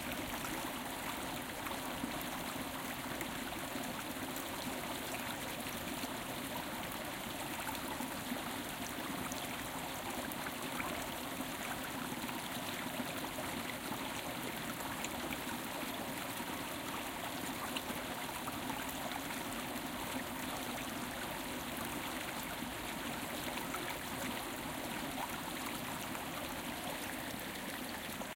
Murmuring, babbling, burbling and brawling brooks in the Black Forest, Germany.OKM binaurals with preamp into Marantz PMD 671.